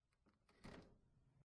Opening balcony door
Opening an old balcony door